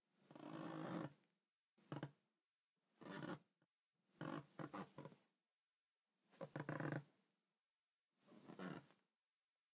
squeeky floor
I recorded this squeaky wooden floor that is covered with carpeting.
floor, floor-squeak, squeak, squeaky, squeaky-floor, wood, wooden-floor